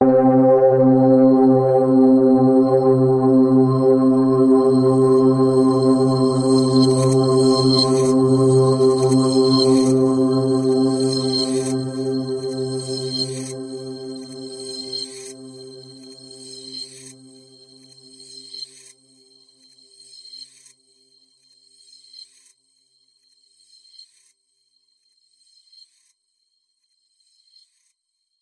A very dark and brooding multi-sampled synth pad. Evolving and spacey. Each file is named with the root note you should use in a sampler.
multisample, ambient, granular, multi-sample, synth, dark